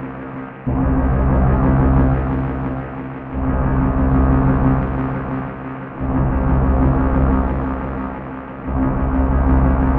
Post apocalyptic spice for your sampler made by synthesis and vivid imagination.